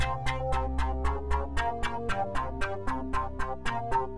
A few keyboard thingies. All my stuff loops fine, but the players here tend to not play them correctly.